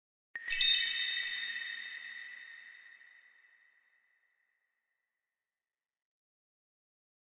Sound of an idea coming to you